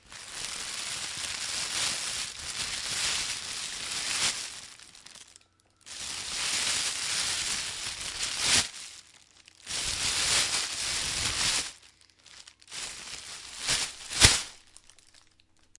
Taking a spar bag and crunching it a bit